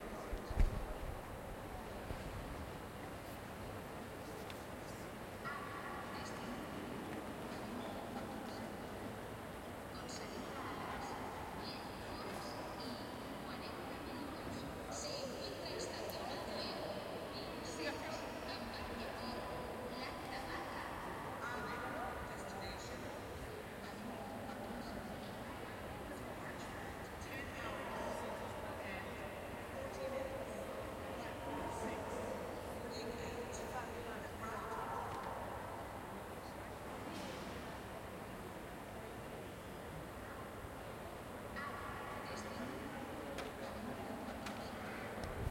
Madrid AVE station Ambience
H1 Zoom. Madrid Train station noise people.